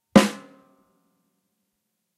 samples in this pack are "percussion"-hits i recorded in a free session, recorded with the built-in mic of the powerbook
drums, snare, unprocessed